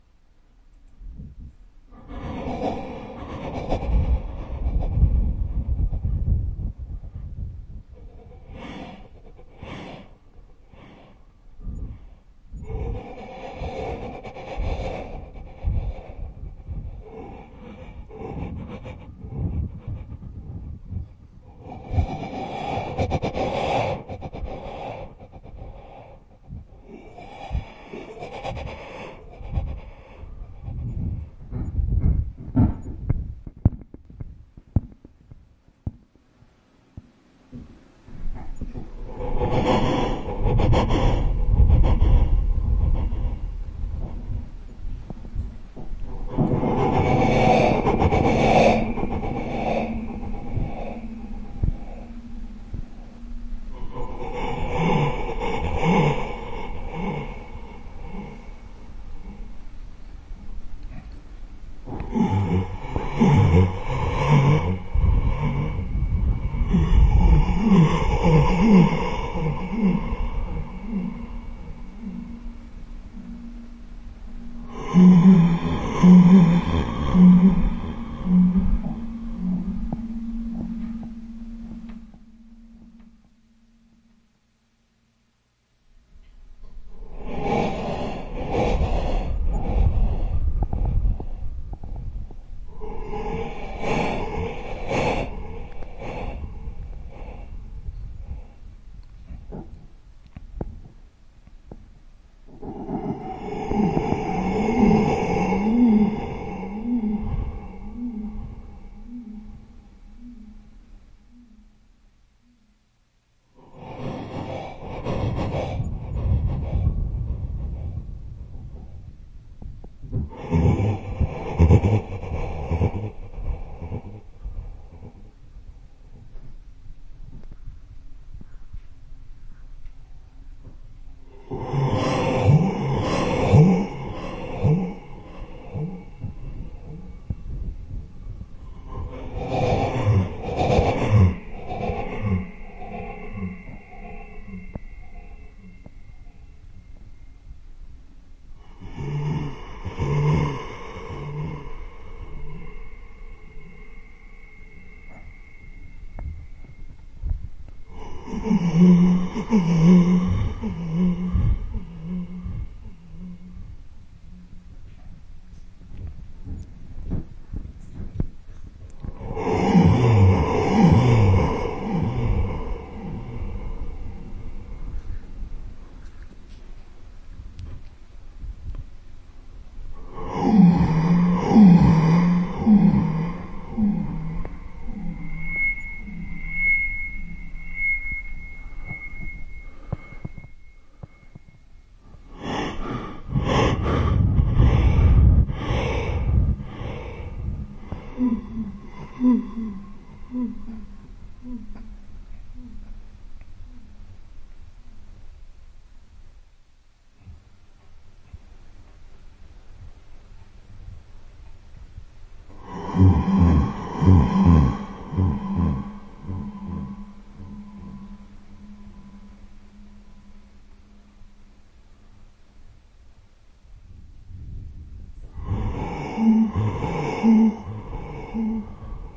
Heavy shuddering inward breaths with audio loops and feedback. Horror sounds, monsters breathing unearthly horror ambient